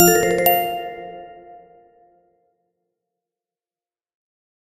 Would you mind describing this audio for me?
Game - UI - Upgrade Sound
UI, Upgrade